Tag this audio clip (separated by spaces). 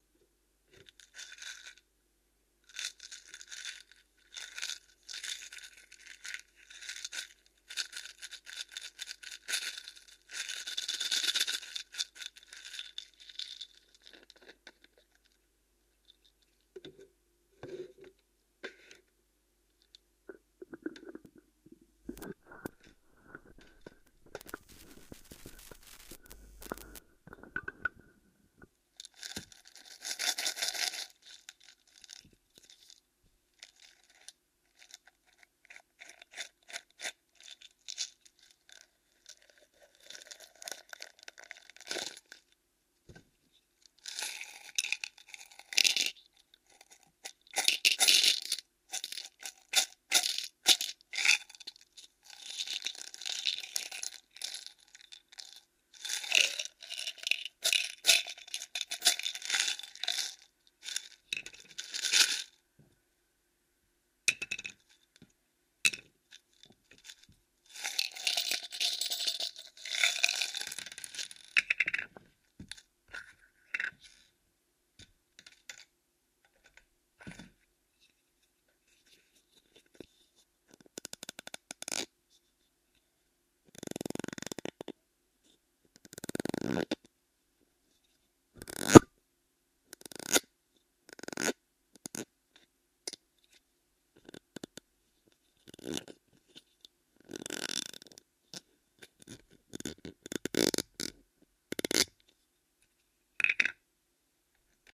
shaking screwbox